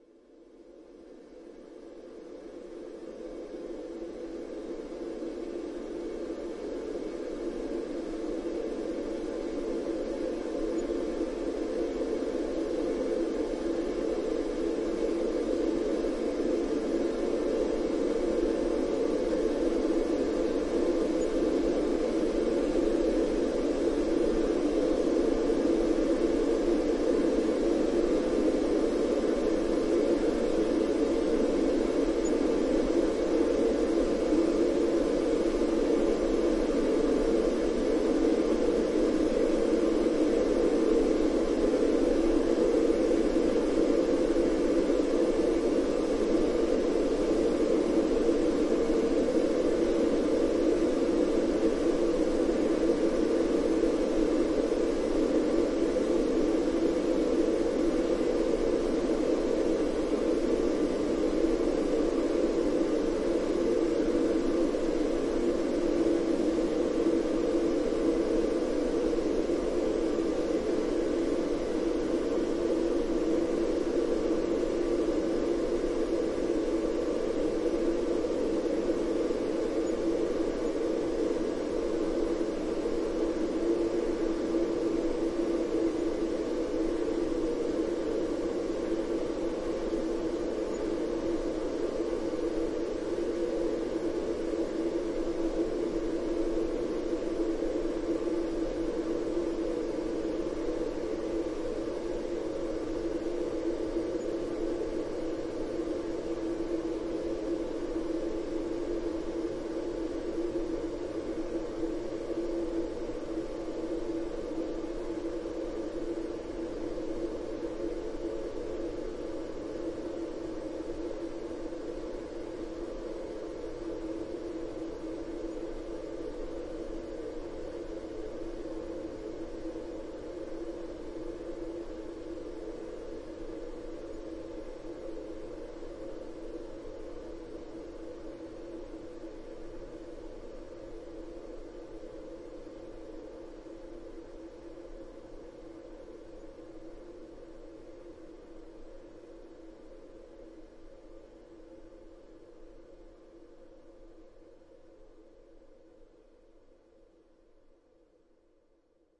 Gust of Wind 1
Processed wind noise.
I slowed it down in Audacity.
60009, air, ambience, ambient, blow, gust, wind